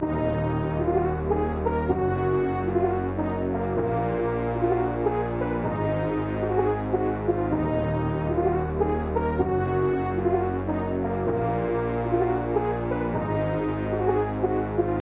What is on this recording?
Me So Horny
8 Bar Loop at 128 BPM. Best Suited for EDM & House Music. Thx!